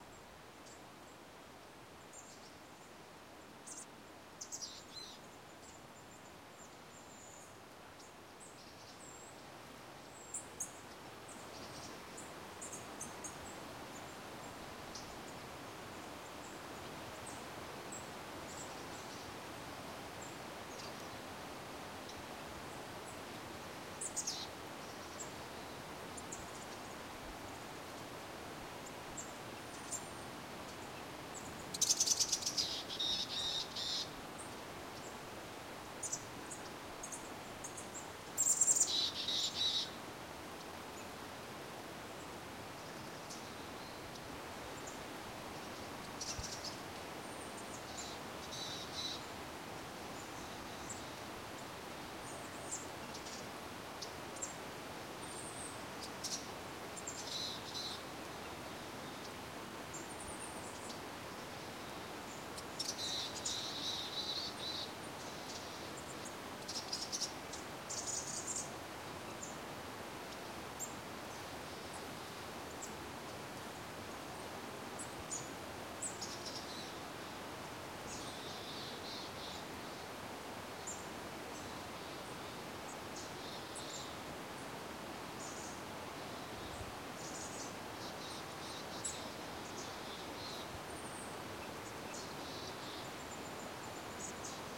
Birds and stuff.
ambience, bird, birds, birdsong, field-recording, forest, na, nature, spring